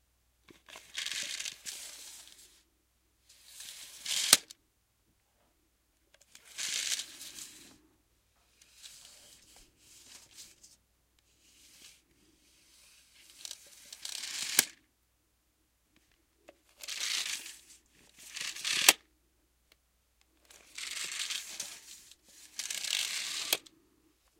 Basic sounds of pulling out a tape measure, fumbling with it a bit, then letting it snap back in place.